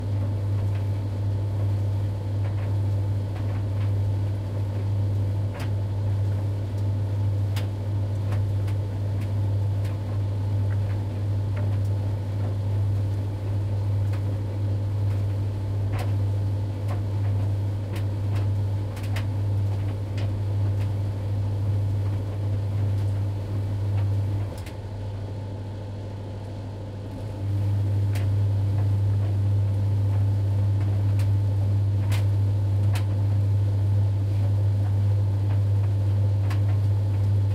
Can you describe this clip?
Zanussi Z930 drier
Zanuzzi Z930 drier, drying clothes. I have another file with more sounds including end sequence and the loud buzzer. Recorded with a Tascam DR-40 in stereo using an XY configuration.
zanussi, drier, tumble